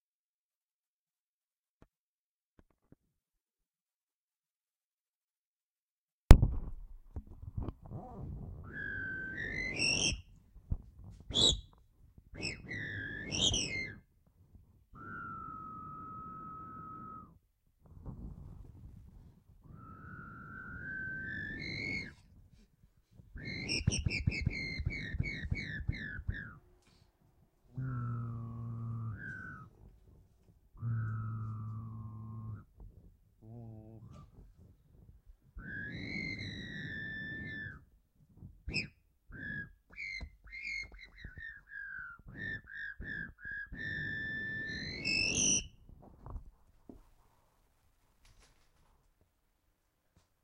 Recording of breathing in plastic tube.